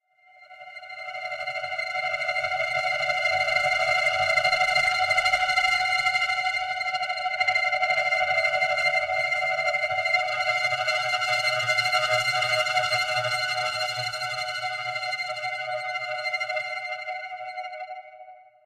stereo feedback drone for cinematic use
illbient, fx, synth, texture, movie, scary, sci-fi, horror, suspence, ambient, suspense, drama, film, filter, synthesizer, pad, fear, effect, monster, drone, soundesign, soundtrack, feedback, alien, terror, spooky, dark, bakground, ambience, creepy